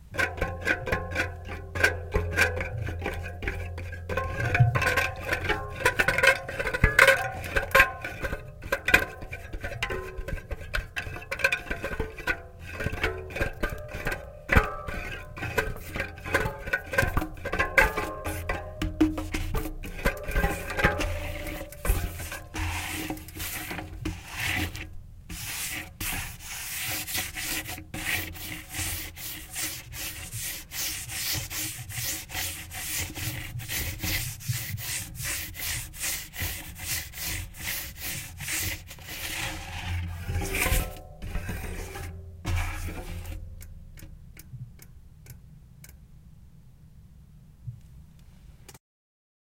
Messy Fingerpads on Tire Spokes FULL
Long file with all spokes sounds
bicycle, bike, fingers, hand, spinning, spinning-wheel, spokes, tire, wheel, whirr